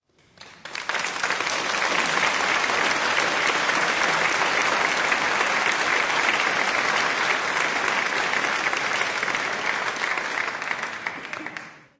The third recording from an event in my town's church.
recording device: Canon XM2 (GL2 for the US)
editing software: Adobe Audition 3.0
effects used: clip recovery, normalization
applause, event, meeting, record, short